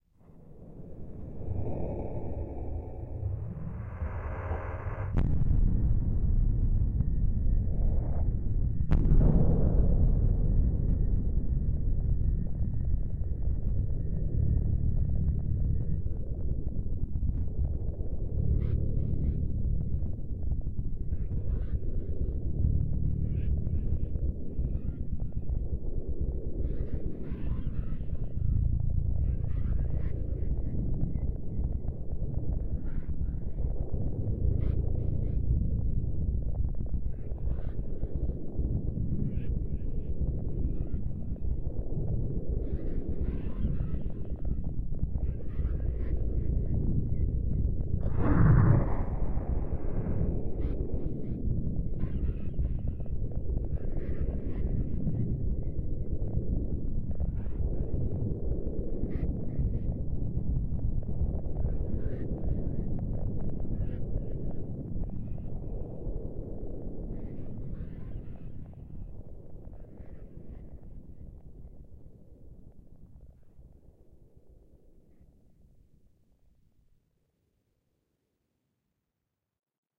éeruption: i created this sound fx
with a lot of tracks: i mixed and have make varied the tune and the
pitch of snares drums bass drums, cymbals and a match witch cracks for
the boom, synth sequenced , sub bass for the vibration and the lave wich flows and the shouts of differents animals that i reversed for the frightened birds and passed in a spectral delay.
it was all mixed and processed in ableton live with a little finalisation with peak and a limiter.